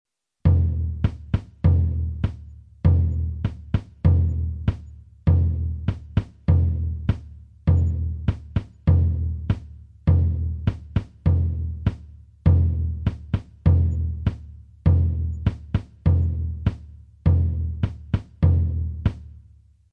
Drum loop x1
Simple drum loop made by me for your project. Can be used perhaps for a war scene or anything marching.
*Nicholas The Octopus Camarena*
Nicholas "The Octopus" Camarena